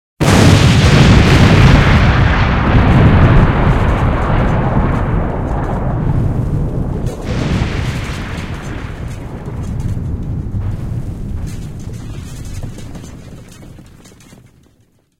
Explosion at a construction site
Recording: Tascam HD-P2 and BEYERDYNAMIC MCE82;
construction, explosion, site